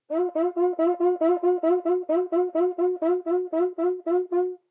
Different examples of a samba batucada instrument, making typical sqeaking sounds. Marantz PMD 671, OKM binaural or Vivanco EM35.
brazil, drum, groove, pattern, percussion, rhythm, samba, squeak, squeal